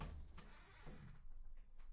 Door wood creak 2
Creaking of a wooden door.